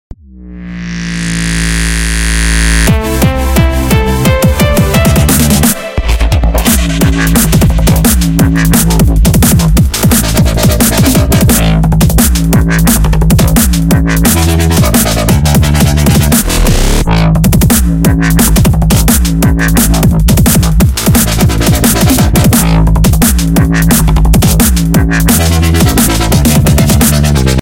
Bloodburner (loop 1)
bass, compression, dubstep, electronic, Fruity-Loops, fx, hat, house, kick, limiter, perc, reverb, snare, synth